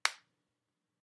Snap of fingers